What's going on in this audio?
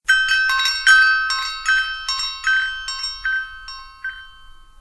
Door bell
opening, ringing, closing, doors